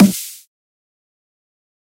Dubstep Snare 8
A fully synthesized snare that was heavily processed and over-sampled.
processed, glitch, hard, snare, adriak, FL-Studio, pitched, over-sampled, punchy, hop, dubstep, skrillex, dnb